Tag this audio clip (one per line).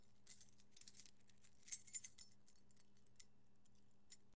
fumbling
hand
hands
juggling
key
keys